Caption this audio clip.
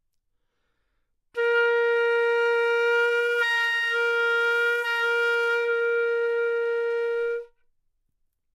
Flute - Asharp4 - bad-timbre
Part of the Good-sounds dataset of monophonic instrumental sounds.
instrument::flute
note::Asharp
octave::4
midi note::58
good-sounds-id::3210
Intentionally played as an example of bad-timbre